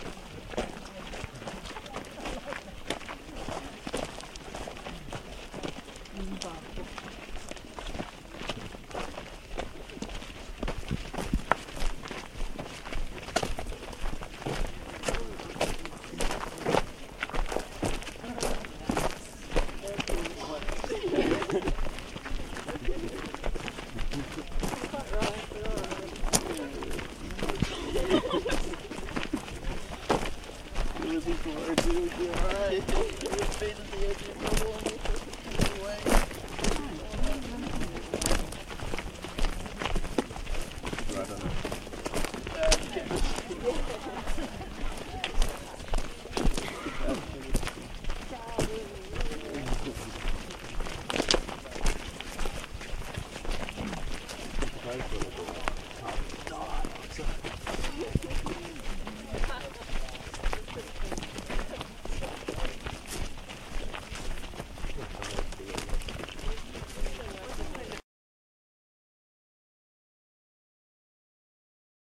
Recorded onto a minidisc at a protest to save Anvil hill in Australia from more mining endevours. Group of 5 walking to make a human sign that says "save Anvil Hill." Features some talking.